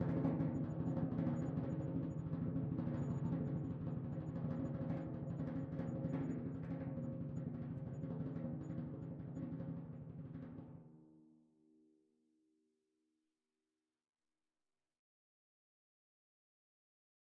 One-shot from Versilian Studios Chamber Orchestra 2: Community Edition sampling project.
Instrument family: Percussion
Instrument: Timpani
Articulation: rolls
Note: E3
Midi note: 52
Midi velocity (center): 40
Room type: Large Auditorium
Microphone: 2x Rode NT1-A spaced pair, sE2200aII close
Performer: Justin B. Belanger